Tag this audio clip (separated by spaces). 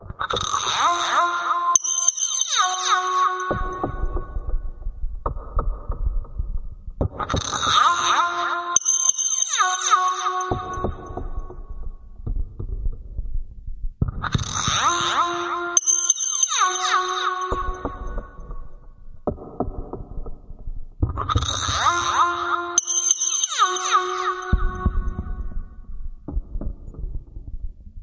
blips effects glitch malstrom subtractor synthesizer